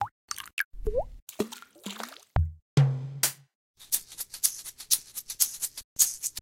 Po-33 Drum Kit Wet
(The Po-33 splits one sound file into a kit of 16 sounds. Hence why a sample pack like this is appreciated)
Hope you enjoy :)
percussion, sampler, samples, sample-pack, 33, wet, po33, Operator, drum, kit, samplepack, water, Teenage-engineering, Pocket, Po-33